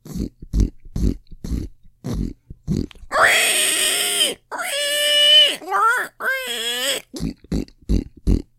Sound of a pig in slop and squealing.